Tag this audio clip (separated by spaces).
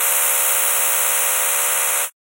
effect factory computer siren noise electronic cosmic analysis sci-fi SF science-fiction